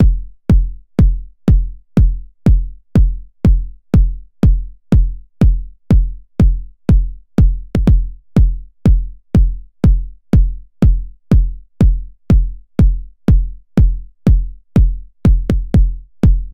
kick jomox
analog kick from a jomox 999